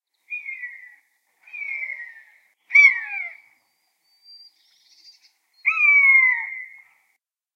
A dual mono field-recording of two Common Buzzards (Buteo buteo). Rode NTG-2 > FEL battery pre-amp > Zoom H2 line in.
bird, birds, buteo-buteo, buzzard, common-buzzard, field-recording, mono, raptor